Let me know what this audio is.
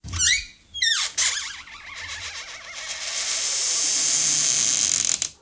Recording of the hinge of a door in the hallway that can do with some oil.